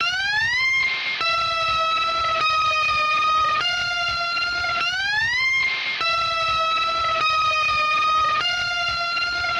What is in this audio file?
Lofi Defy joker keys 3